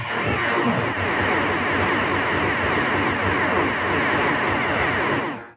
6505 granulated 5 (phaser guns)
Please refer to the first sample and previous samples in this sample pack for a description of how the samples where generated.Using longer grain settings on the granulator plug-in created what sounds like a two fleets of battle ships firing at each other!
laser-guns, space-battle, space-guns, special-effect